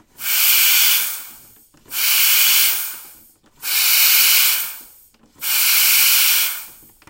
sound of steamer from a coffemaker